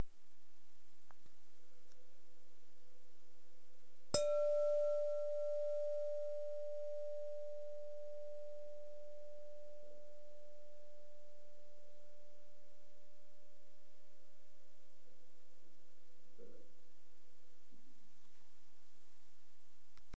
Striking glass bowl with the nail.
bowl, glass, nail